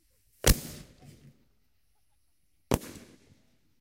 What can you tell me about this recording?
Fireworks recorded using a combination of Tascam DR-05 onboard mics and Tascam DR-60 using a stereo pair of lavalier mics and a Sennheiser MD421. I removed some voices with Izotope RX 5, then added some low punch and high crispness with EQ.